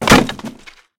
Table Smash 1
Crack, Destruction, Smash, Table, Wood, Wooden